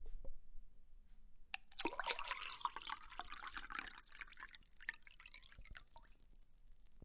Water in a glass,recorded on the zoom h5 at home